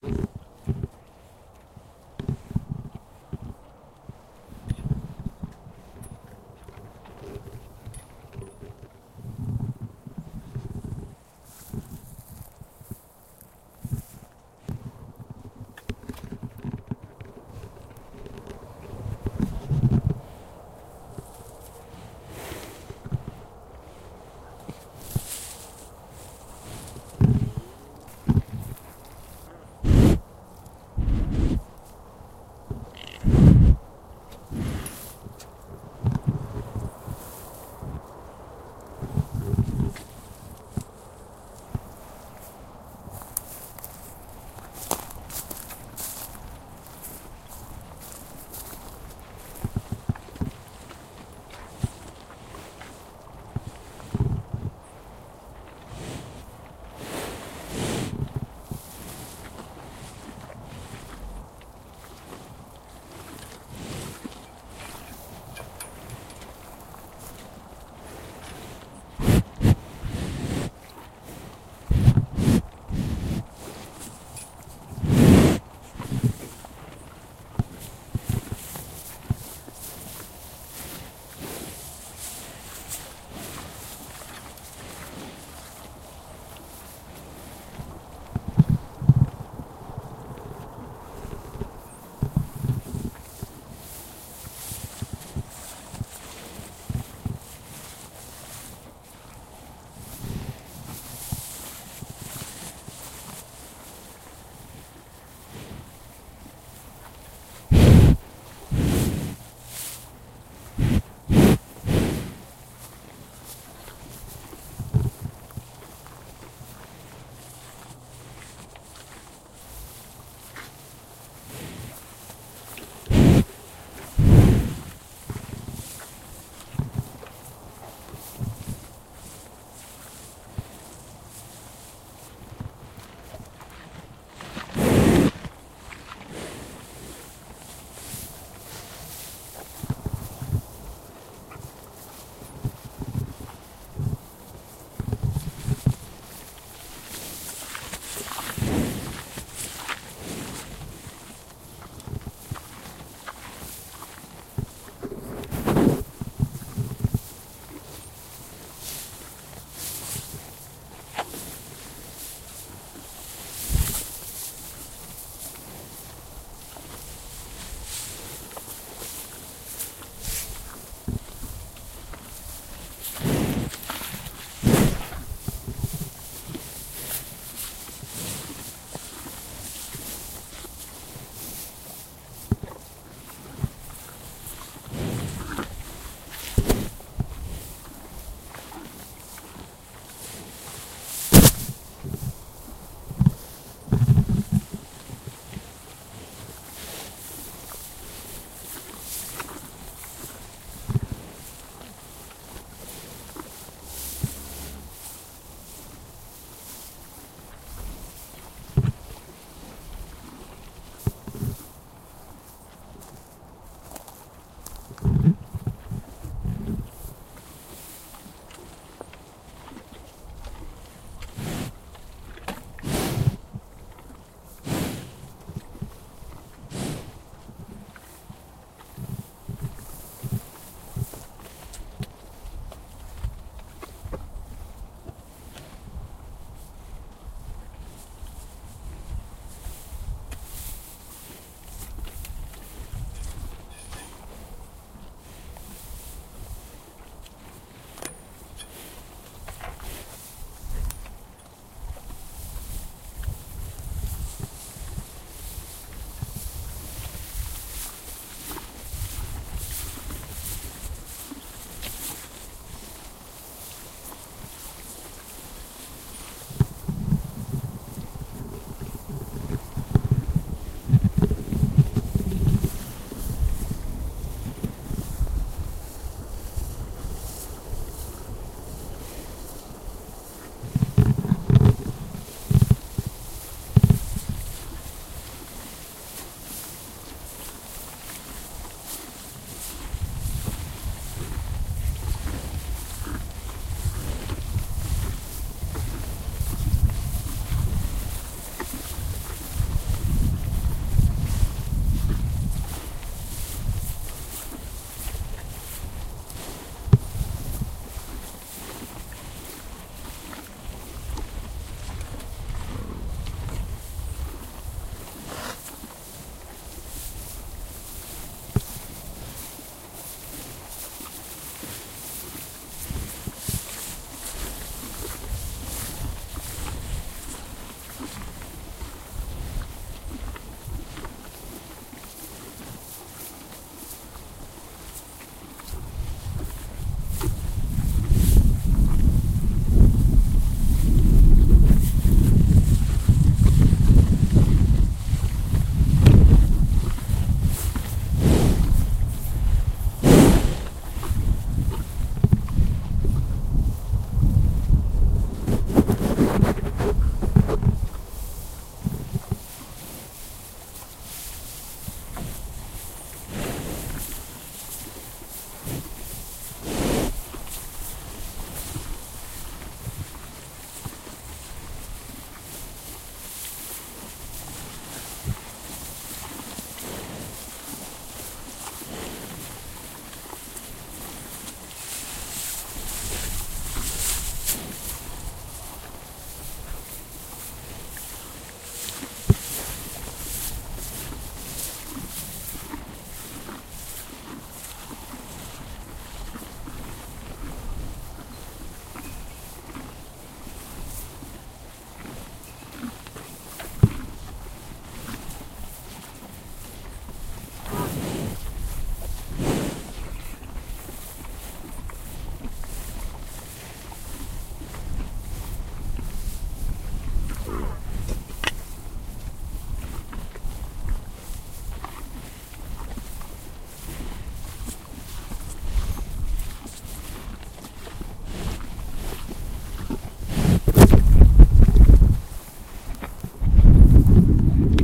Cows Grunting and Eating
I needed a sound of cows chewing. So I went driving around in the countryside and found some cows close to a road that were eating in a trough. I put my mic close to them while they munched away. Sometimes they licked the microphone I was so close!